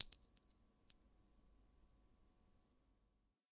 Small bronze bell.
bell, small